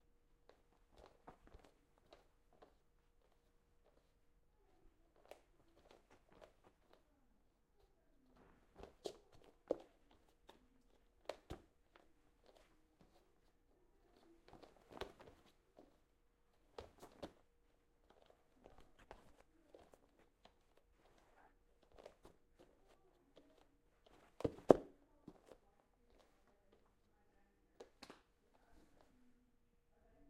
A quick run on hardwood floors by someone of the lighter weight variation.